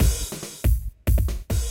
140 bpm break beat drum loop 5
140 bpm break beat drum loop
break-beat, 140-bpm, dubstep, drum-loop